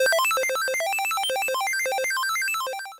Fast-paced achievement
It can sound like a 1980's Namco arcade game for when one receives an extra life.
Created using Chiptone.
8bit, chip, decimated, lo-fi, retro